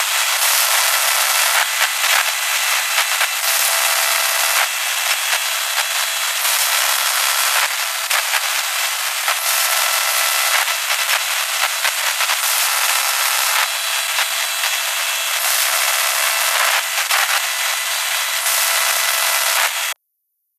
Glitch & Static 02
This is a recording of the internal components of my computer using my Audio-Technica AT8010. The processing here is a simple stretch with some compression techniques.
Crackle, Static, Radio, Sound-design, Robot, Glitch, Computer, Digital